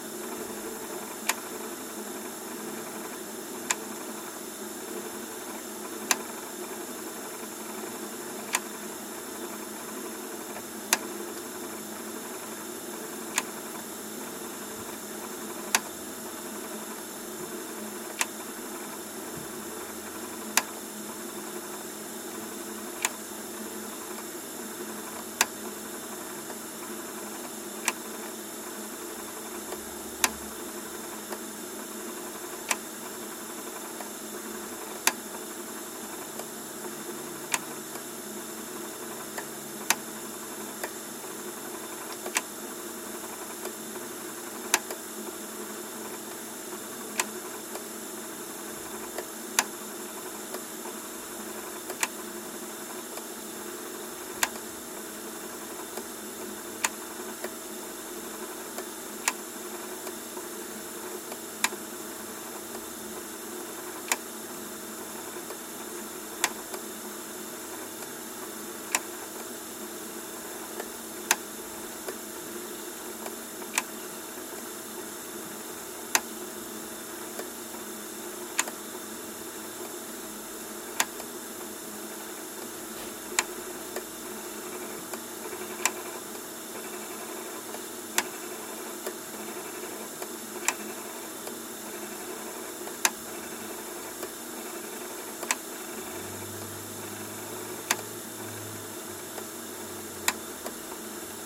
cassette deck tape turn
cassette, tape